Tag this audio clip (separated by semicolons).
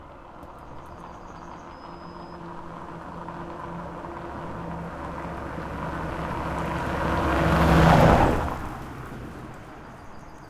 Pass-by; Sett